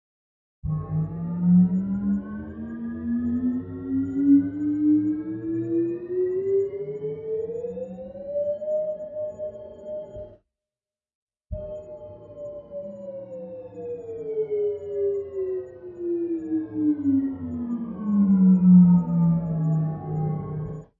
a spaceship elevator. up & down. Created with a synthesizer. FM Synthesis. Instrument used: FM-Four (a freeware vsti)